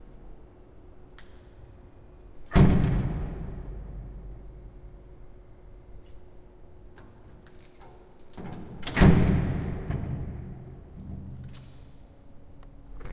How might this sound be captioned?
Big Metallic door
Recorded this sound with a Zoom H4n pro on Silo-auto in Oporto, Portugal.
closing, door, doors, metallic-door, open, outdoor, shut, slam